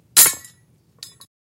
this is why you are here: the means for producing musical culture should be free. the fruits of our collective labor should not be taken by capitalist pigs in suits who did none of the work, should not captured and jealously guarded by those who would ruthlessly exploit our passions and work, should not be sheltered behind a legal apparatus heavy with its own contradictions, protected by the threat of violence.
it is a process of turning what is common and shared (culture) into an atomized, individualized object that can be owned. of course, we, having known that the assumptions the argument for the musical commodity is founded upon are faulty/empircally inaccurate/politically motivated, see a way out of the morass.
Chain Snare #1 was recorded with a tascam dr100 and a rode shotgun microphone. that which binds us to the present can be modulated and transformed into something else. our chains are historical, but our electronic imaginations are astral.
ambiance, cruz, drum-kits, field-recordings, rhythmanalysis, santa, urban